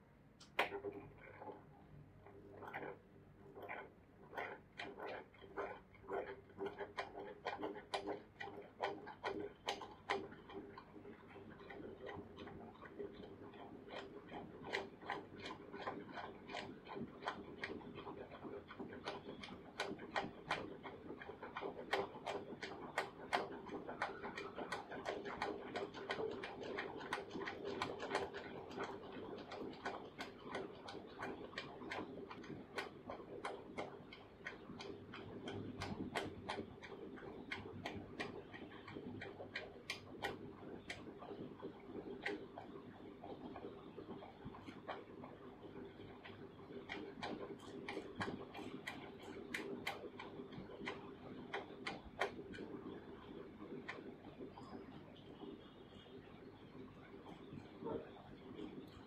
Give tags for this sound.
device; Broken; mechanical; spinning; old

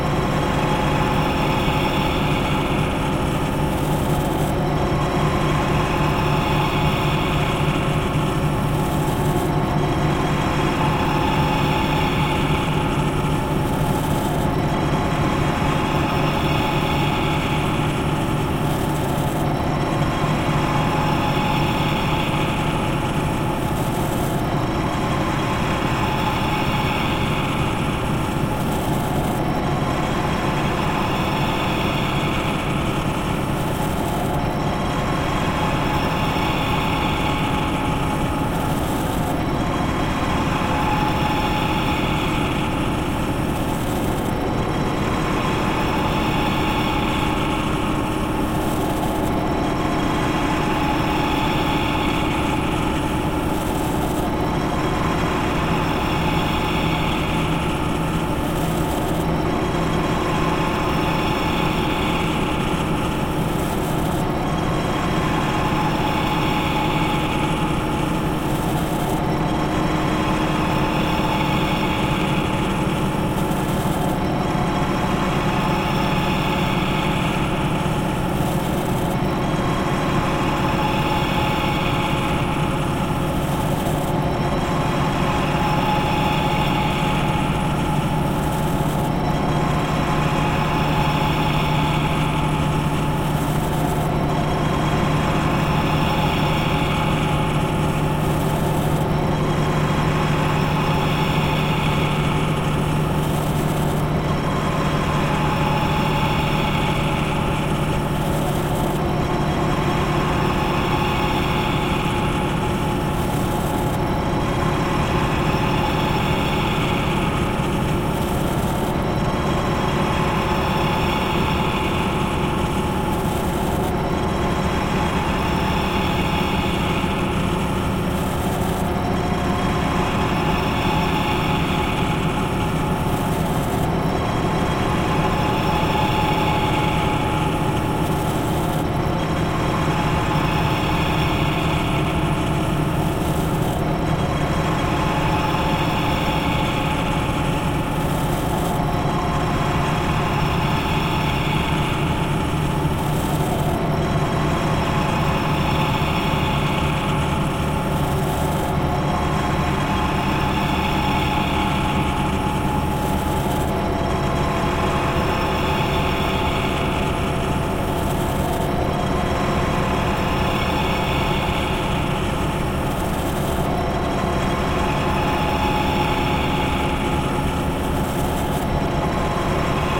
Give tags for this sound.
ambience; factory; industrial; machine

